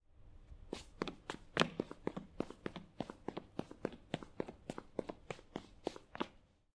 6 Running at the station
Running at the subway platform.
running, CZ, Panska, subway, platfrom, underground, Czech, Pansk